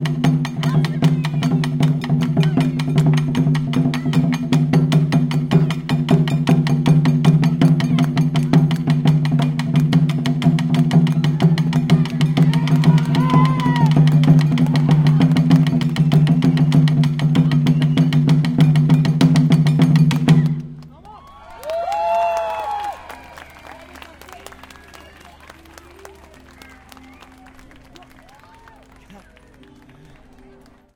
shimbashi festival taiko
Taiko Drumming at a street fair in the Shimbashi neighborhood of Tokyo. This drumming marks the end of the festival. You can hear cheers and applause afterwards.
Recording made on 24 July 2009 with a Zoom H4 recorder.
asia, dance, festival, japan, shimbashi, taiko, tokyo